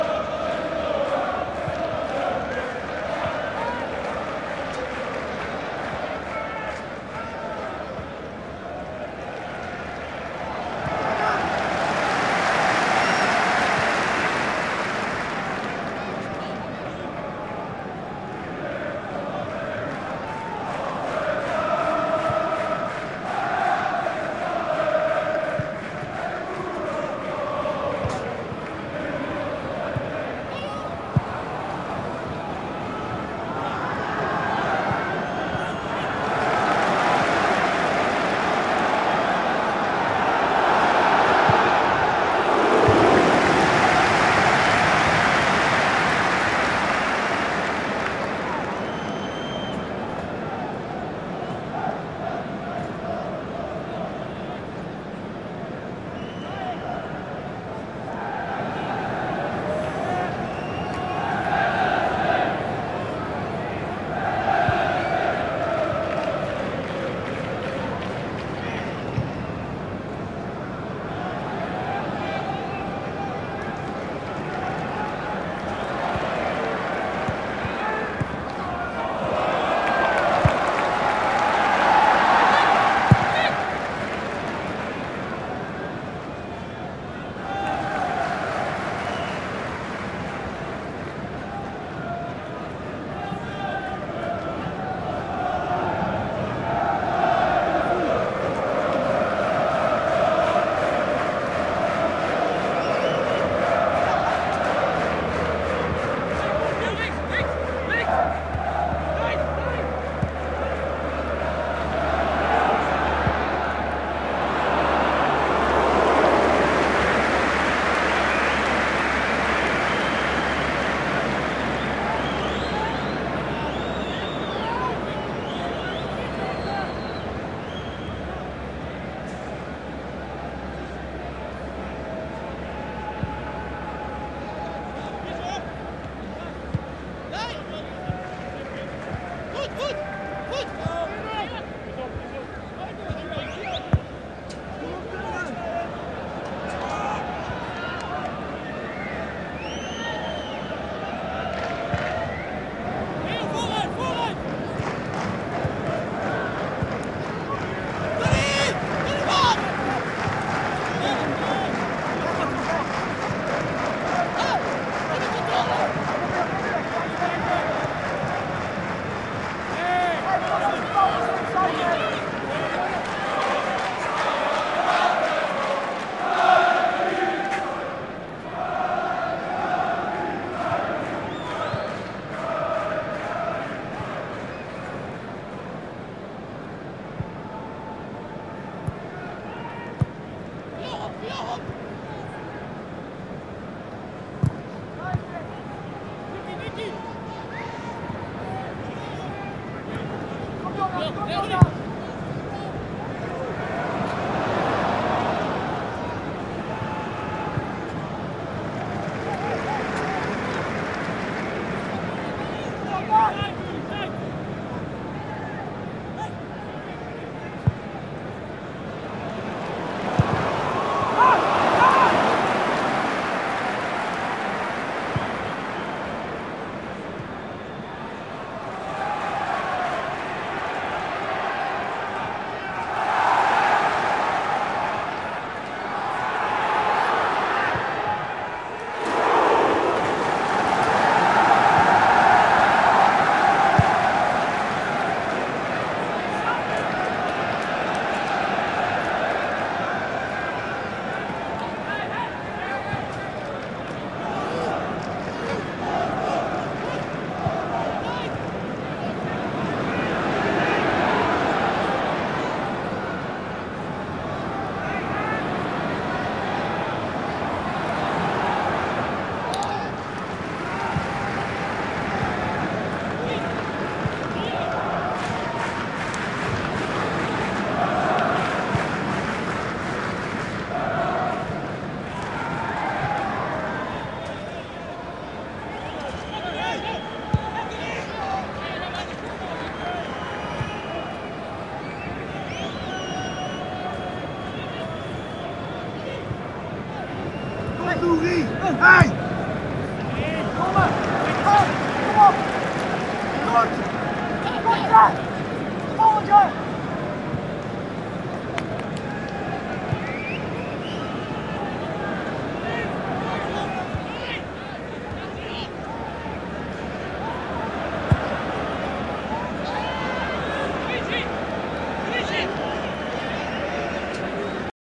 Couple of minutes of soccer/crowd noise at a feyenoord game, the kuip stadium in rotterdam, netherlands.
This was mixed for t.v. broadcast and quickly recorded to the line in of my macbook.
Neumann km 140 mics for crowd noise, sennheiser 416p's at the pitch, into a lawo mc66/2 desk. Stereo, dolby pro logic II encoded.